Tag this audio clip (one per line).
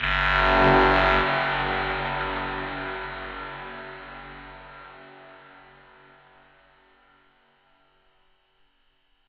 effect
fx
Menu
music
percussion
sound
stab
synth
UI